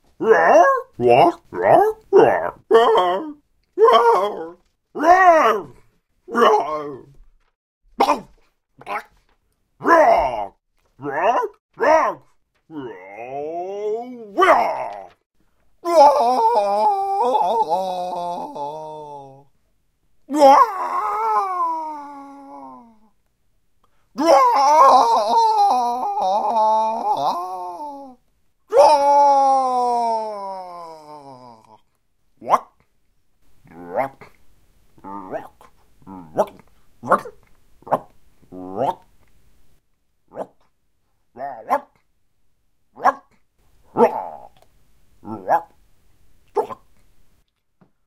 Robin - Frog
Different emotions of a cartoon/anime style frog in a war game.
animal, cartoon, character, english, frog, game, game-voice, language, quack, scream, shout, speak, vocal, voice